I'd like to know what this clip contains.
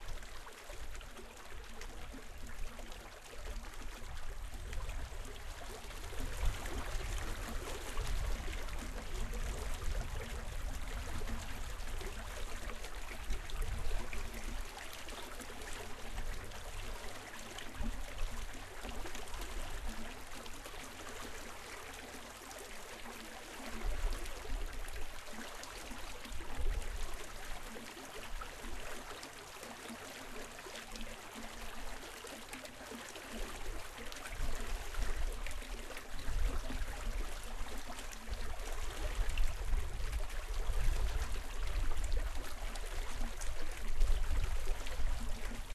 water steram
صدای جریان آب در استخر چشمه علی دامغان
The sound of water flowing in pool Cheshmeh Ali Damghan
Record by Zoom H6 with XY Capsule
stream, water, waterfall, iran, water-sound-effect, h6, river, amirhossein